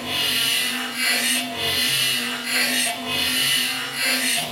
Grained Pans 001A(Loop)
You can rarely go wrong with granular processing.I have taken some percussion sounds from hitting pots and pans and put them through a granulator VST plug in (KTG Granulator).This sound was a cut from a larger file that I edited to make it loopable.
harsh, high-pitched, clank, metallic-drone, pot, pan, metal, processed, loopable, pots, loop, looped, drone, granular, granulated, pans, kitchen, metallic, percussion